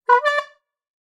Horn Toy (Claun like sound) 3
Simple recording of a toy horn. I believe that I recorded it with tascam dr-05. I don't remember exactly, if I'm right, that was long time ago.
No additional editing or processing.